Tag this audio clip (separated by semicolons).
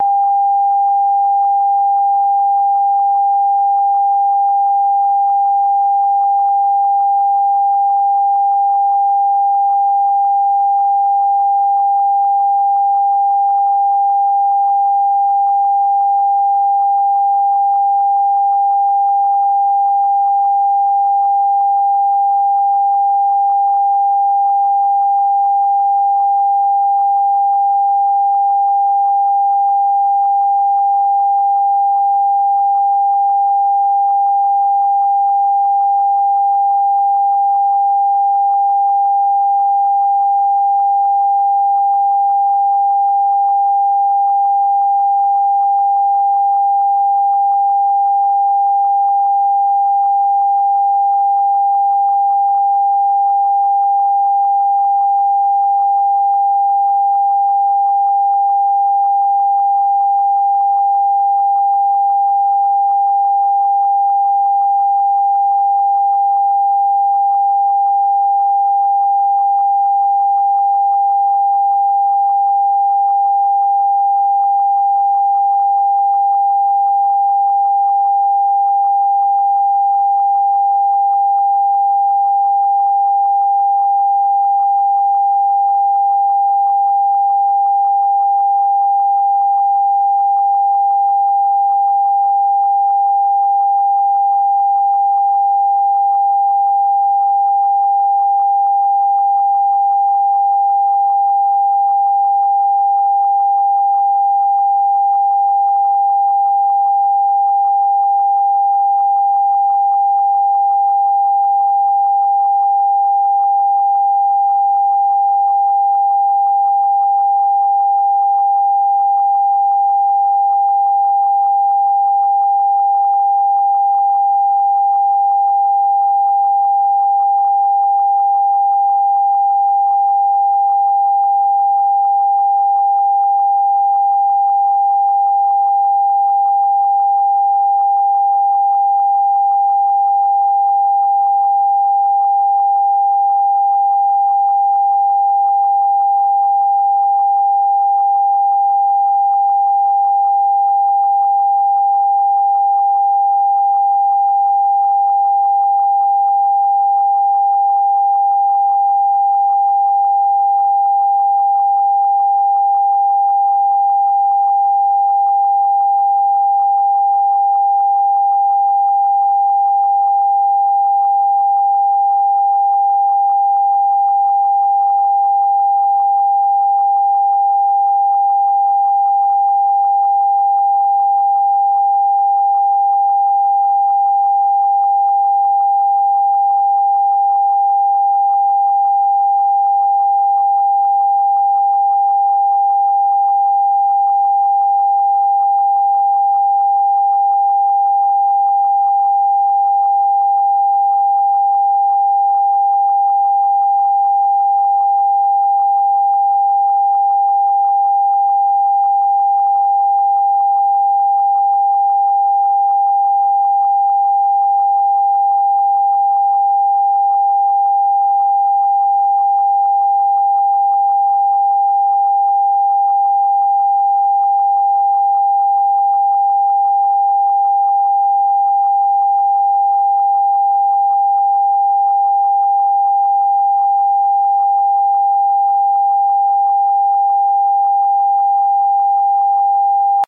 electric; sound; synthetic